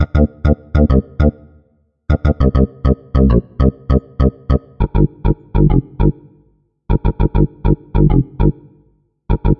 gl-electro-bass-loop-028
This loop is created using Image-Line Morphine synth plugin
bass, dance, electro, electronic, loop, synth, techno, trance